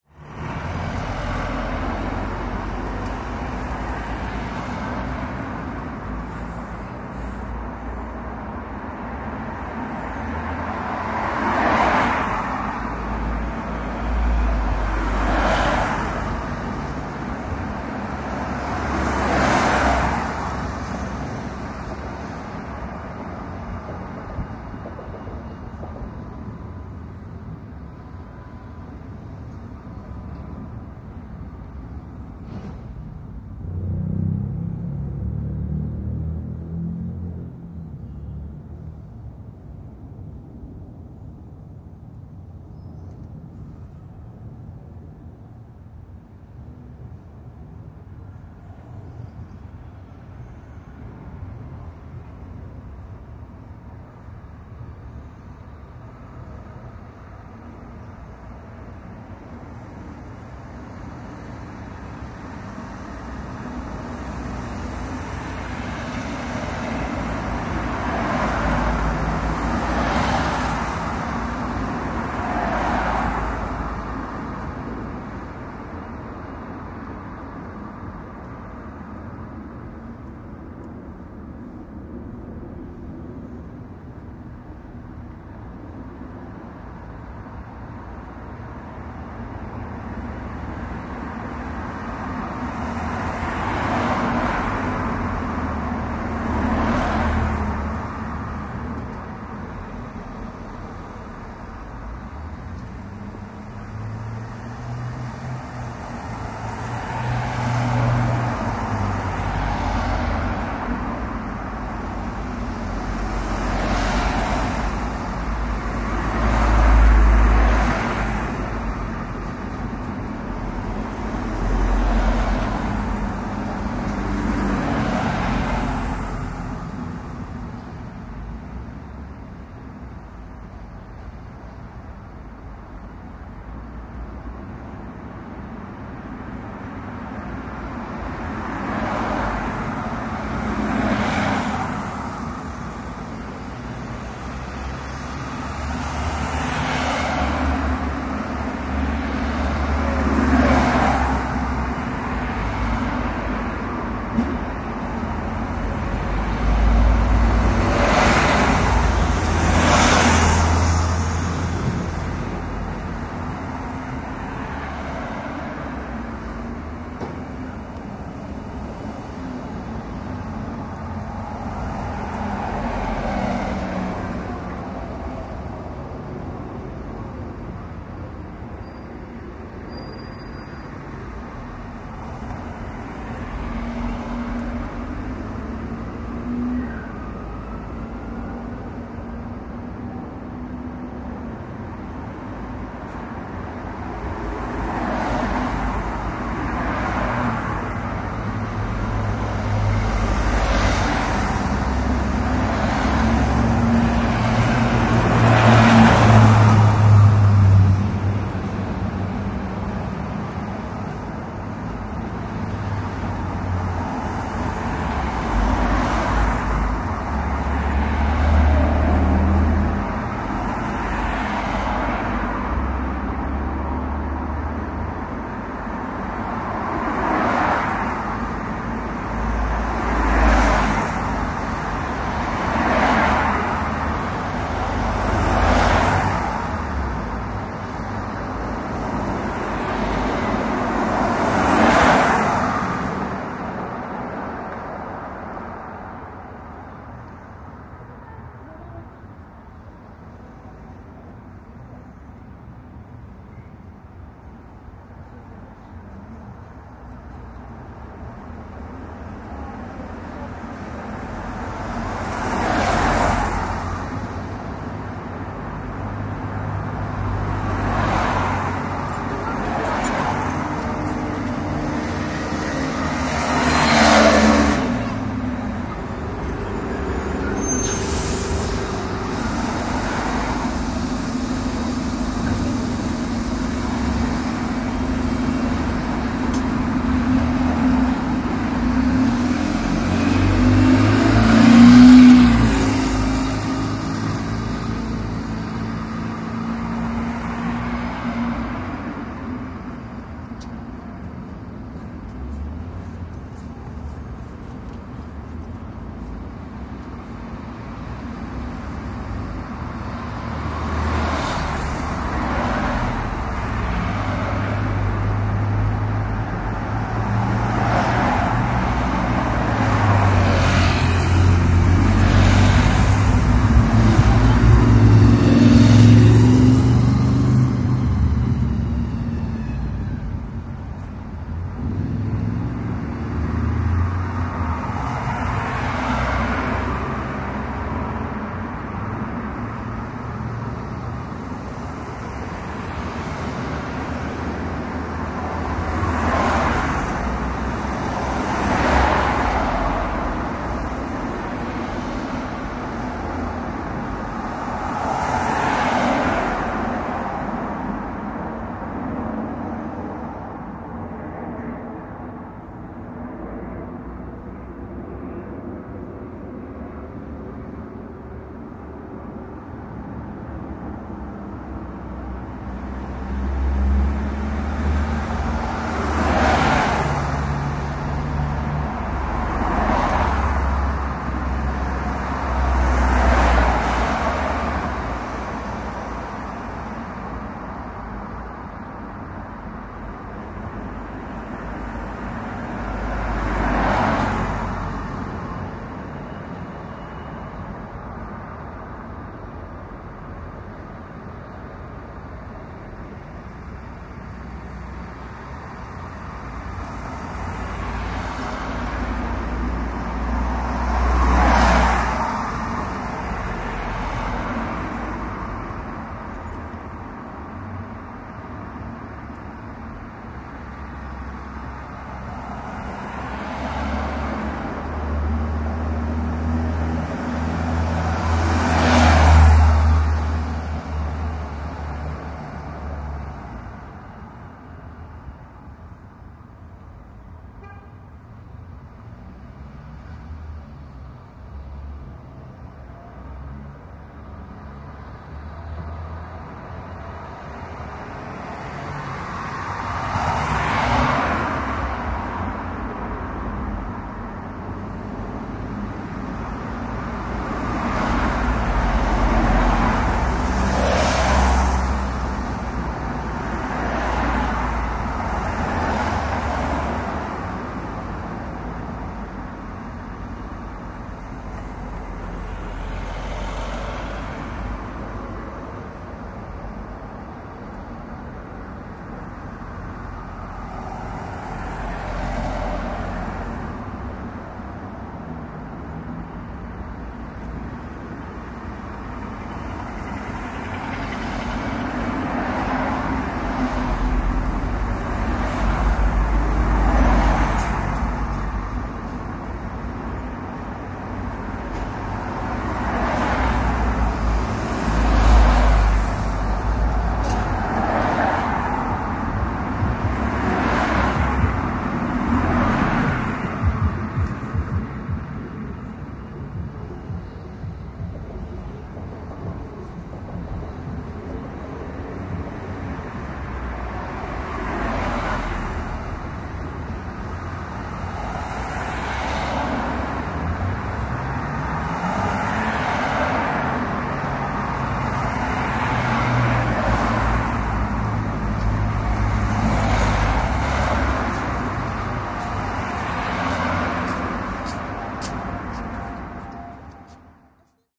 Traffic - Edward Street (Pt. 1)
The sound of traffic along Edward Street, London!
Tascam DR-05